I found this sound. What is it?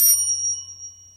20th chime in Mark Tree with 23 chimes
chime, marktree, barchime